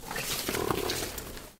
Big plants (crops) growing quickly
a sfx I used when I wanted a fast growing plant sfx
plants, tree, grow, crops, earth